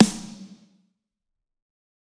Snare Of God Wet 016
the only fail of this pack was made all sounds scream too much since the beginning of the range :(
snare, kit, set, drumset, realistic, pack, drum